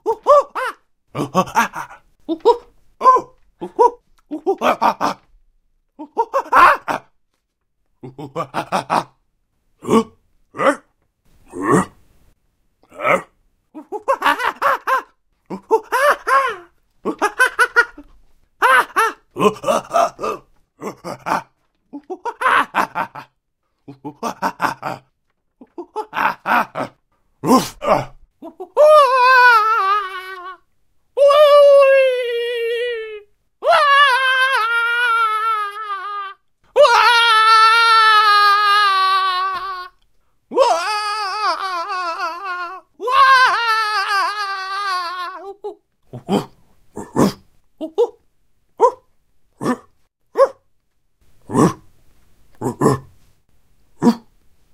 Sebastian Denzer - Monkey
Different emotions of a cartoon/anime style monkey in a war game.
shout, ape, speak, game, voice, character, animal, cartoon, language, monkey, english, scream